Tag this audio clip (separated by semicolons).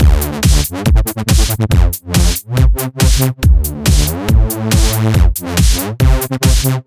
Dance; Electro; Loop; Minimal